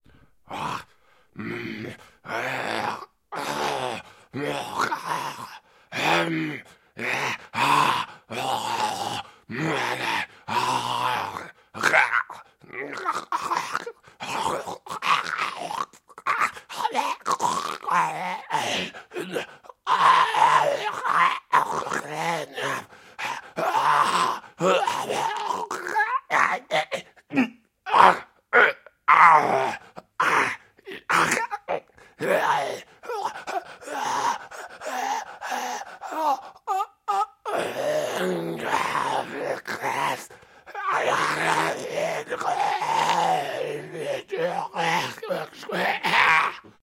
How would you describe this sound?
Mix of zombie groans screams
scream, groan, zombie